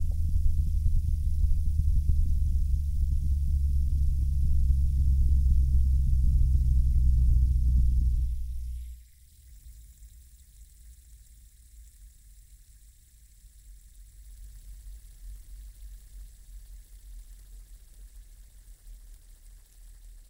Spinner,recorded on the zoom h5 at home